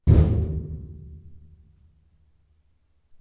Scrap Tom 1
Tom made of metal scraps.
tom, metallic, junk, drum, scrap, toms